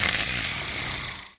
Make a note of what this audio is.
Z-Fem 01 - Roar
Female Zombie Roar Type 01 From my ZOMBIE VOICE sound pack.